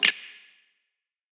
zippo opening (Freeze)
sounds recorded and slightly modified in Ableton